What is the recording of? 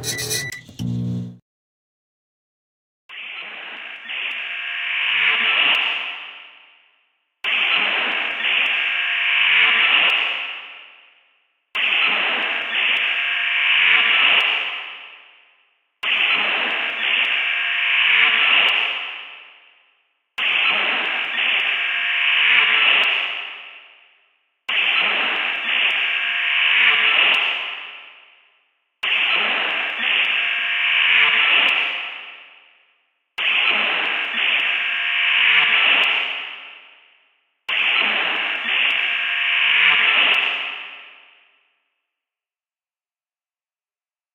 Extra Terrestrial Signal
It is meant to sound like an enigmatic signal or message.
noise, Extra-Terrestrial, movie, processed, harsh, ominous, Alien